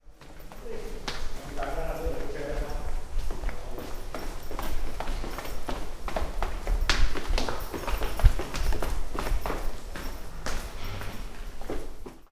stairs environment
sound environment of stairs in the UPF Poblenou library, where you can hear several persons up stairs and down stairs.
UPF-CS13, environment, stairs, campus-upf, library